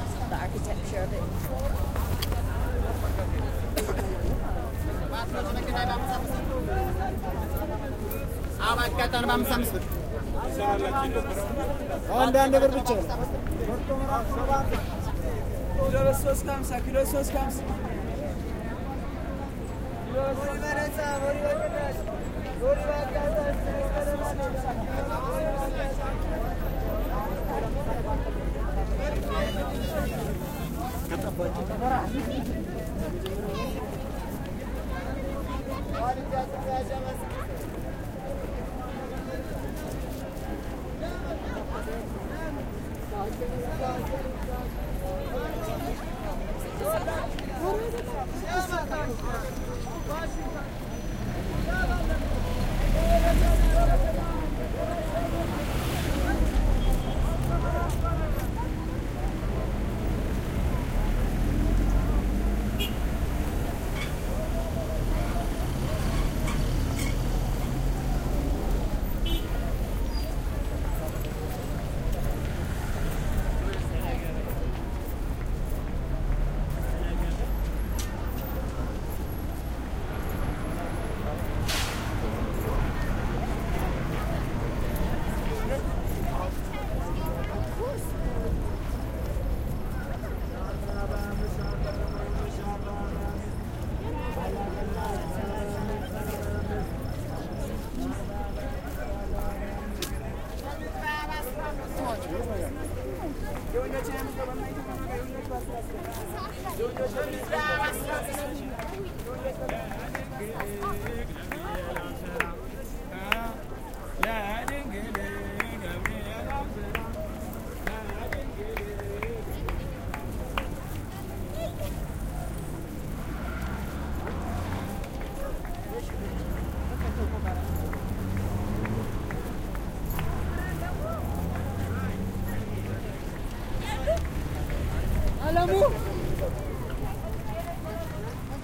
late February 2008: Addis Ababa, Arat Kilo roundabout
walking from the post office, across the road and towards Meganagna
ethiopia, street, field-recording